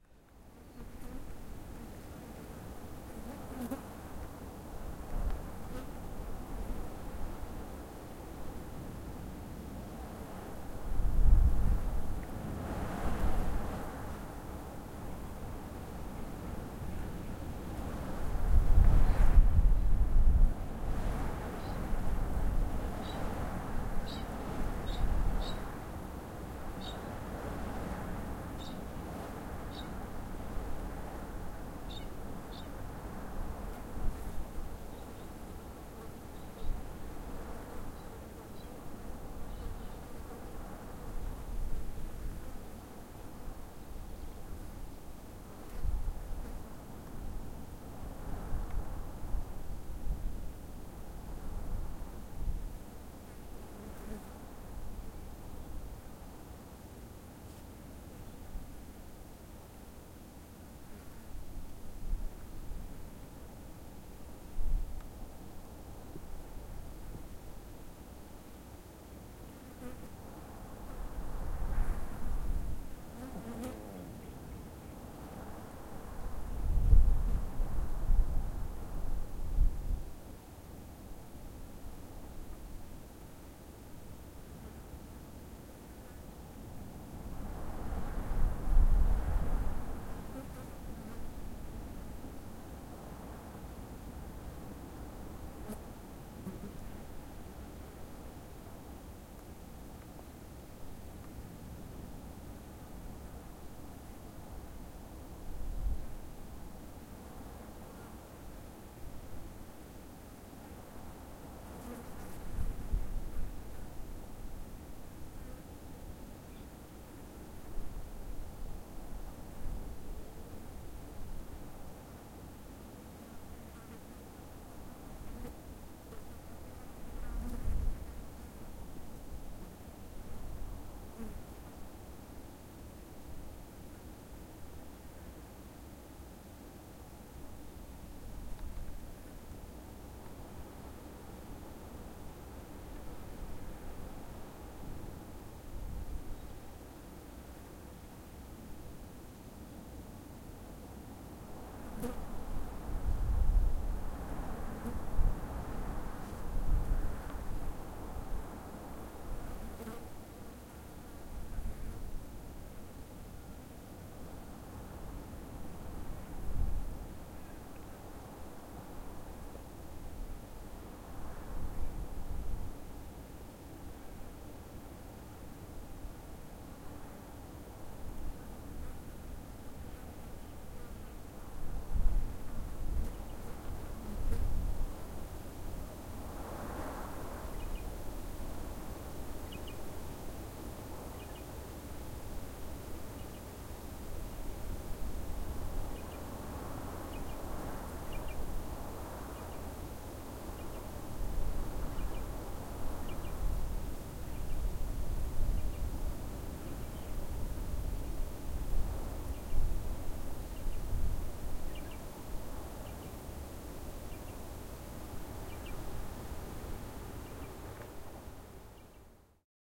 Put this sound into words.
Kanyaka Ruin
wind, trees, windy, field-recording, nature